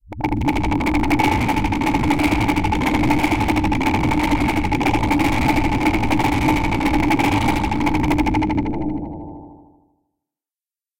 Rhythmic, low pitched noise pulses with vocal formant filtering, reverberant spectral sweep at end.
sci-fi, sound-effect, horror, synth